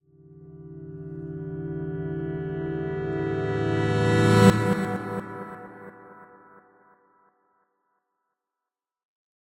This is a riser made from a strum of an acoustic guitar, reversed, Reverb, and a low pass echo.
Have fun :)